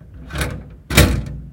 Metal gate thud sound effect I made for a video game I developed.
Close, Door, Gate, Metal, Thud, Unlock